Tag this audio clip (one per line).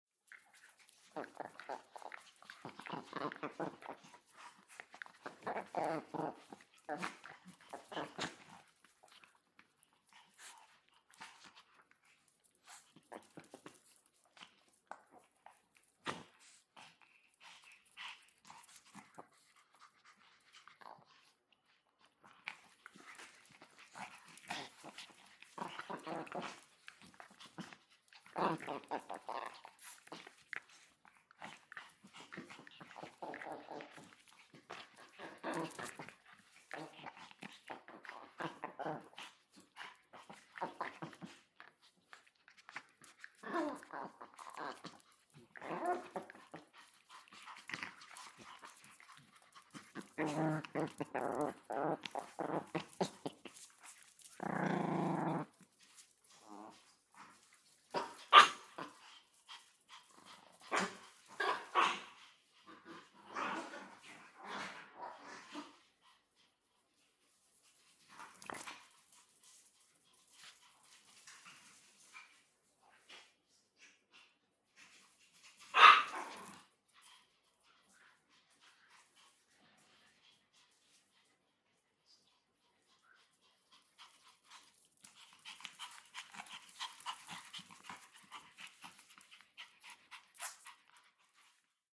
bark
barking
chihuahua
dog
dogs
playing